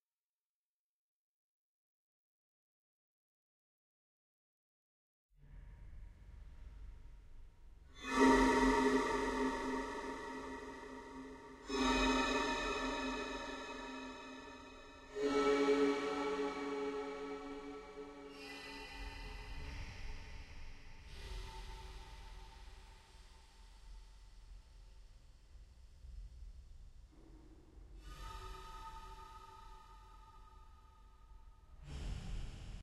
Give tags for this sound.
ambient; atmo; creepy; scary; sinister; spooky; terrifying

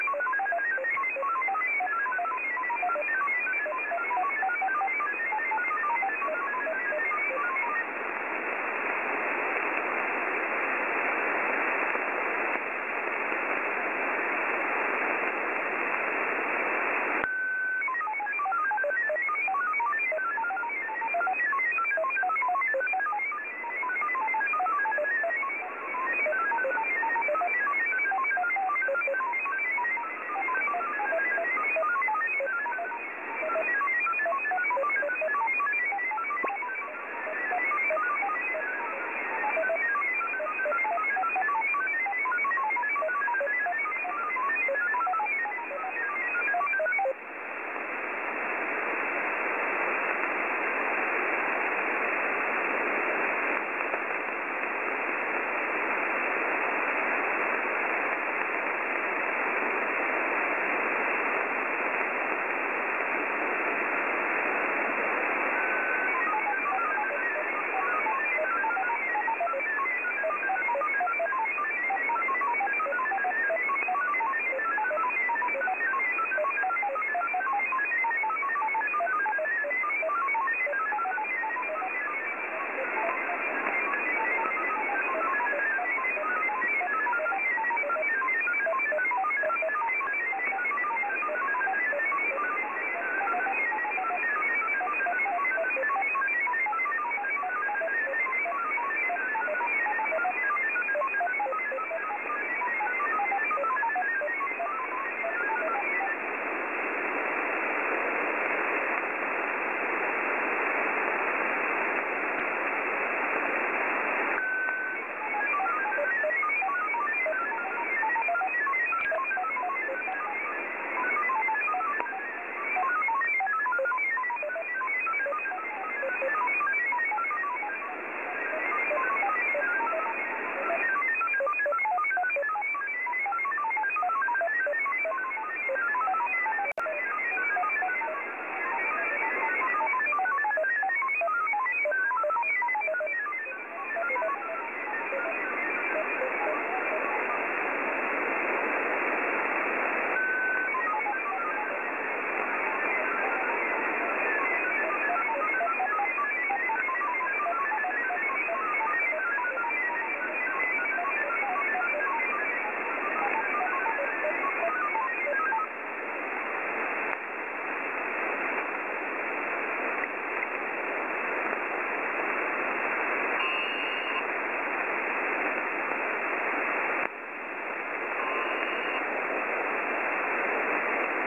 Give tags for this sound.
ROS; modem; USB; voice; static; transmission; shortwave; radio

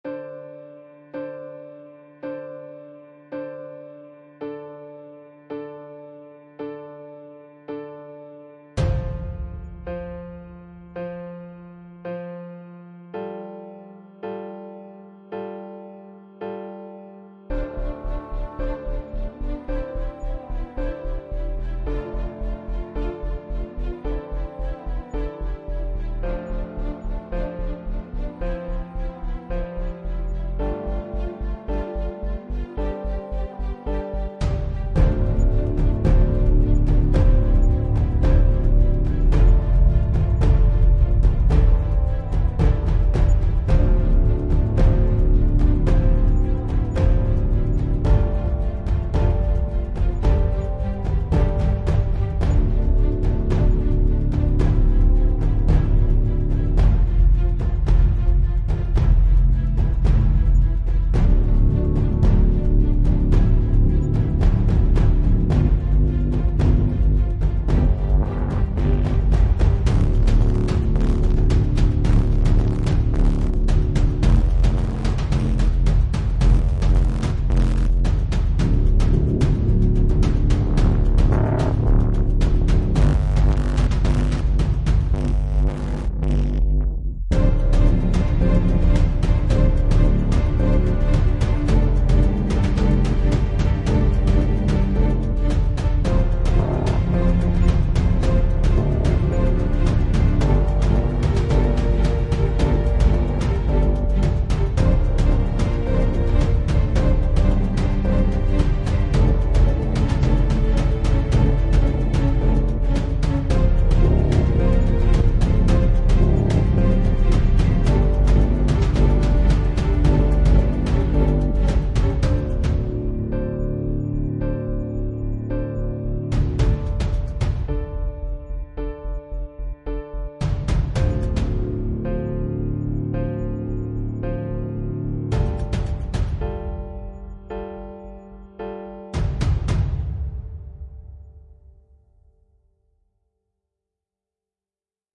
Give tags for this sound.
cinematic; music; native; ethnic; experimental; software; flute; instruments; classical; voice; sci-fi; neo-classical; electronic; singing; voices; choral; choir; first-nations

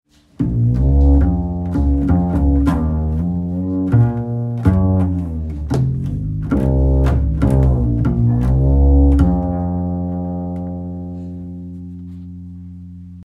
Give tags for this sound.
doble; Double; dubstep; wobble; low; bass; sub; paso

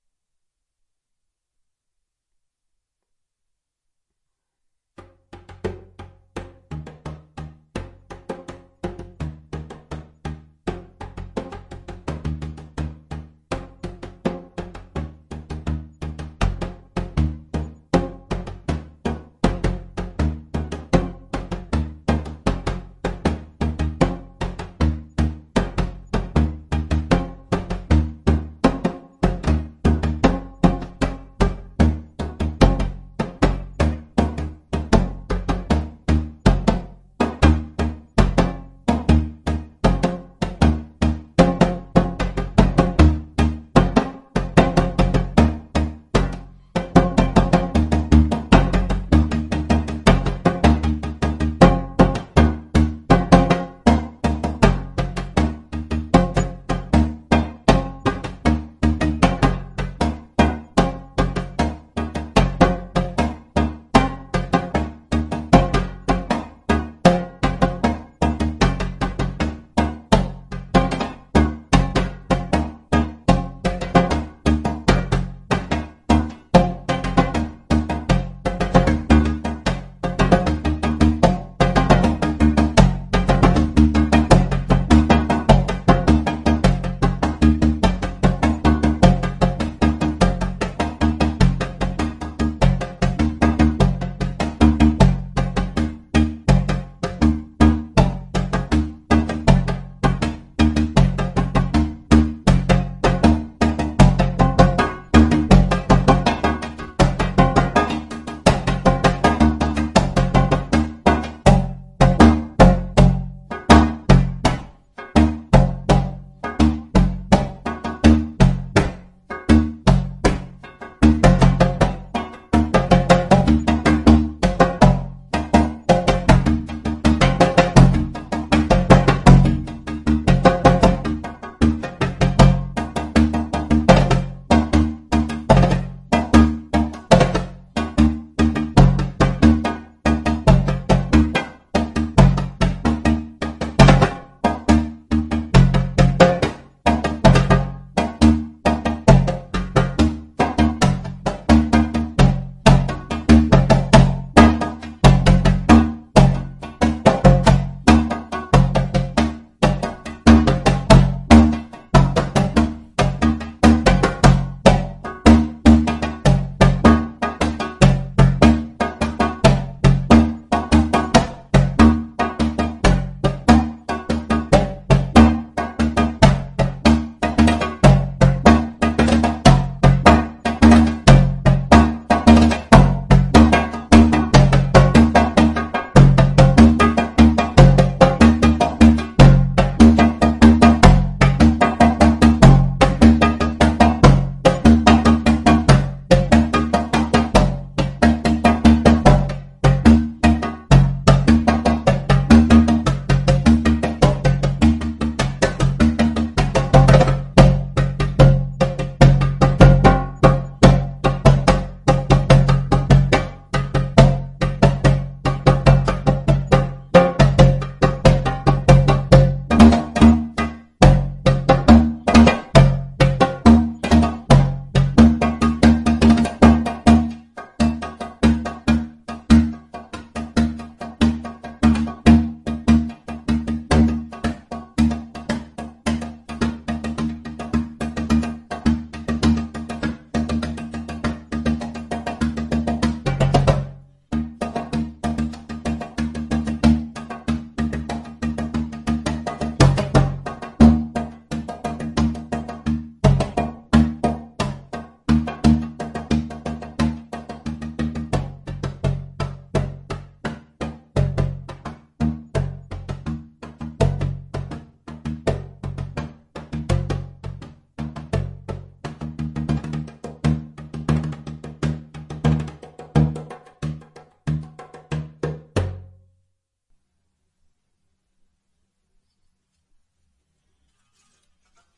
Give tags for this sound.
beat; beats; djembe; drum; funky; groove; groovy; improvised; percs; percussion; rhythm

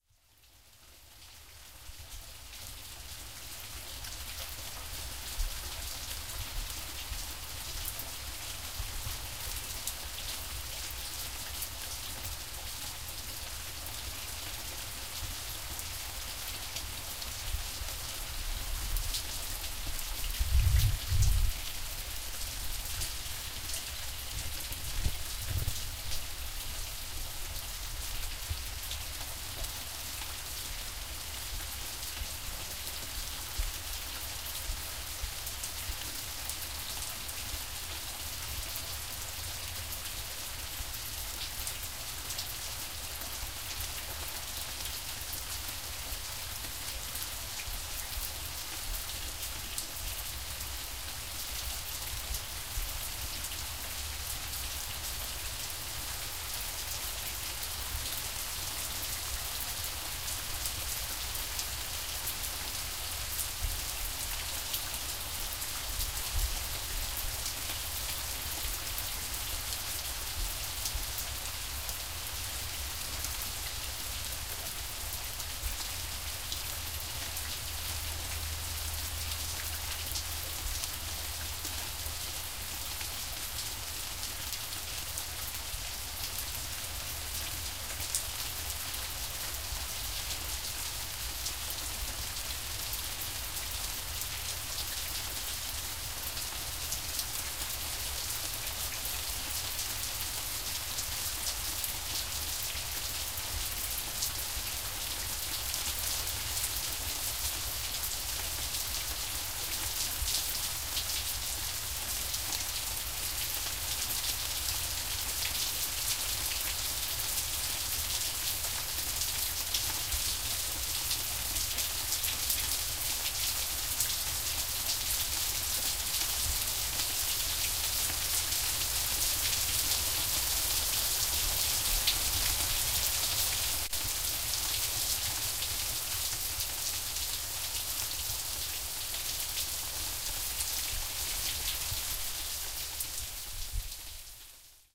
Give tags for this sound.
stereo; lightning

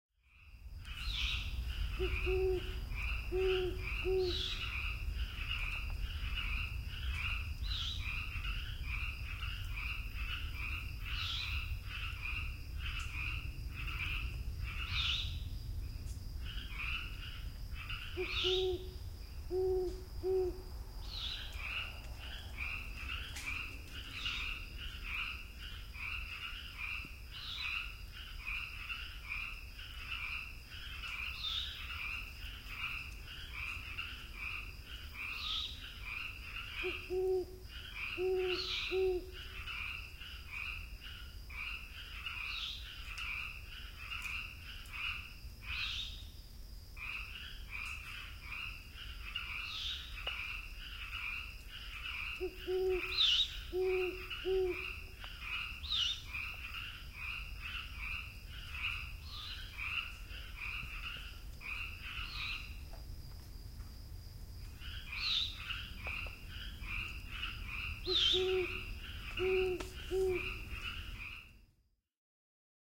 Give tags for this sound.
horn; owlowlfrog; field-recording; frogsgreat

